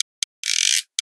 A dual mono recording of a thimble running across and tapping a corrugated glass milk cooler, then processed to sound like a washboard. Made in response to a request by PeterMan.